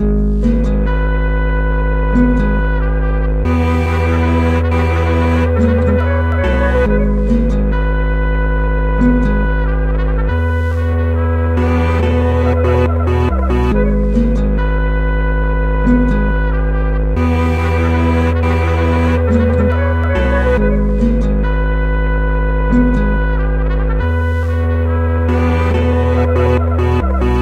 Many different samples, cut, sliced and mixed together
clarinette, guitare